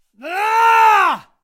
A short male scream.
frightened, human, male, yell, scared, scream, voice, vocal